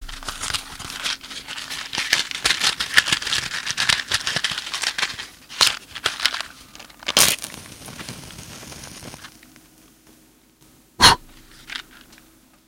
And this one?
match strike
Recording of a match being lifted from a box and struck. Recorded with a Marantz digital recorder and and a Shure SM58 from 3 inches away.
domestic, fire, foley, fx, match-strike, matches, smoking, sound-effect